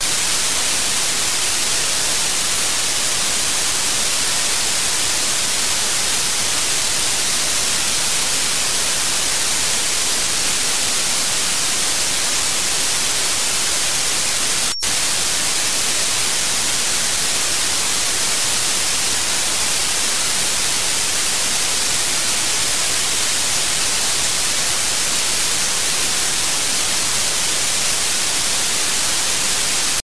38 Dust Density 5000
This kind of noise consists of a certain number of random values per second. This number is the density. In this example there are 5000 random values per second.The algorithm for this noise was created two years ago by myself in C++, as an immitation of noise generators in SuperCollider 2.
density, digital, dust, noise